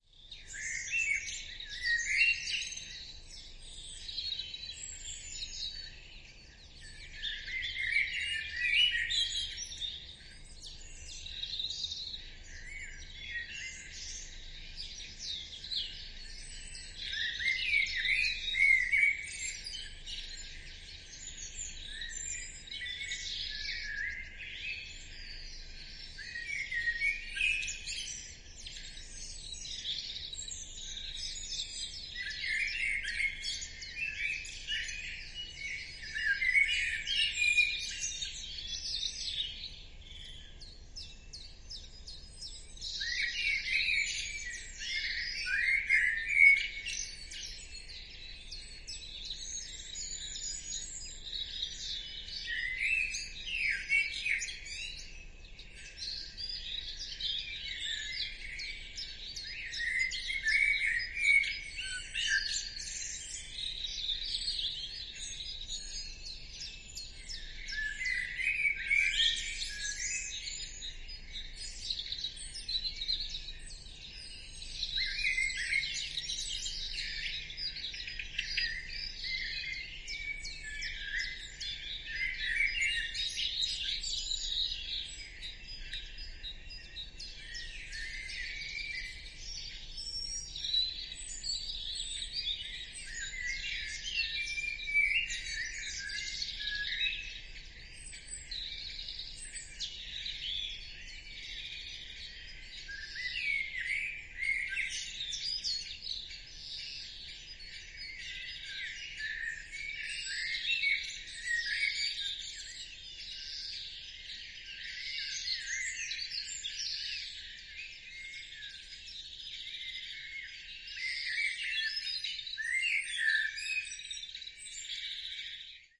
Blackbird loud and clear as well as several other birds.
Outdoor ambience recorded with MS mic on Zoom H6 recorder.